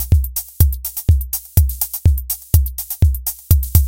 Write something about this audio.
part of kicks set
drum, electronica, kick, trance